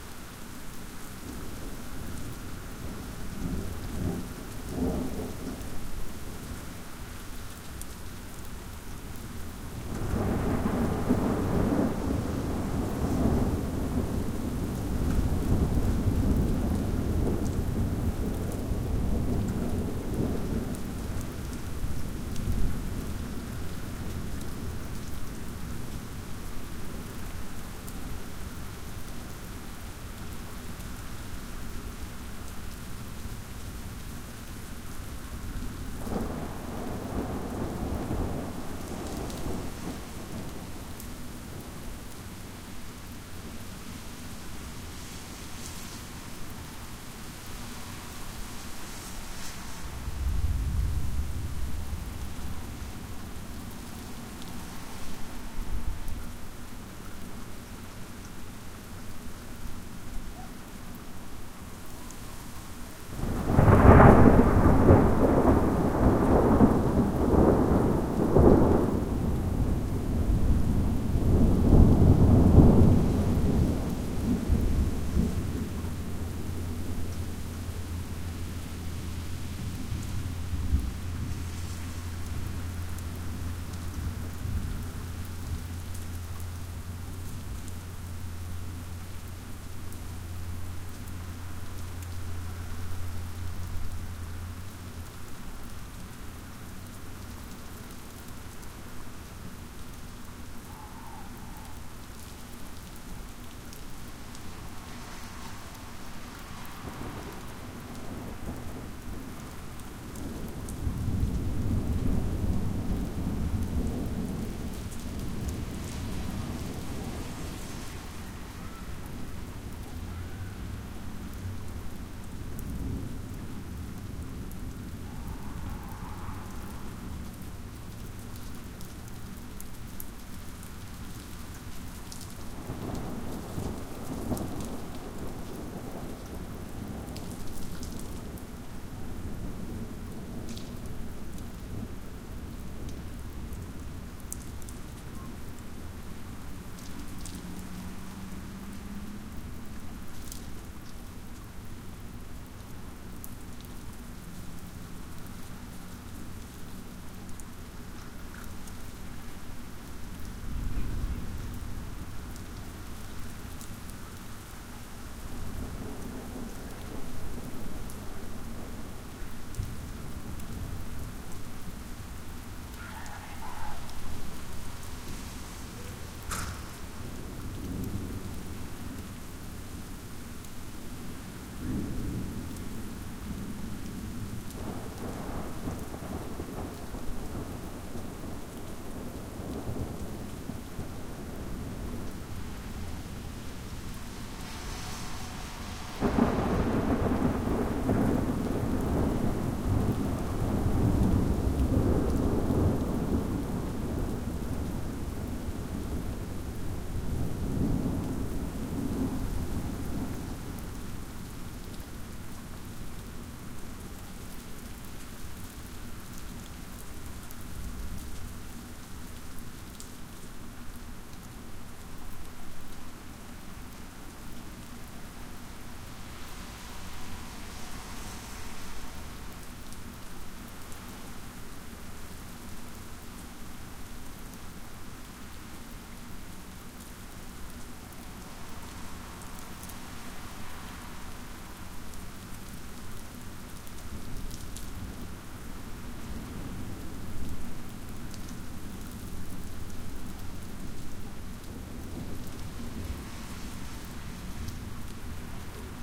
Rain Ambiance
An ambiance track of rain and some thunder taken while at school one night